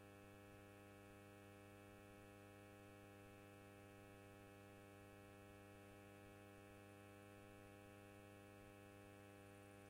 the hum of a small electrical substation
buzz, electric, electrical, electricity, hum, substation